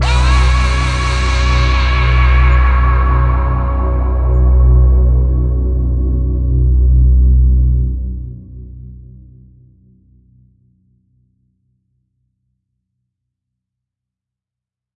Vocal one-shot/hit sample made in FL Studio.